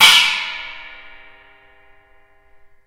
12 inch china cymbal struck with wooden drumstick.